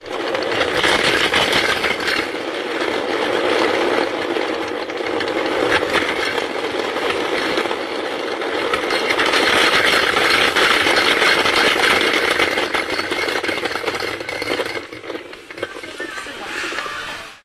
top toy 191210
19.12.2010: about. 19.30. top toy sound. M1 supermarket in Poznan.
field-recording, soundtoy